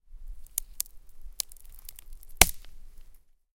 Twigs snapping
A recording of twigs being snapped in a quiet forest.
ambience, crackle, field-recording, forest, Nature, snap, snapping, tree, trees, twig, twigs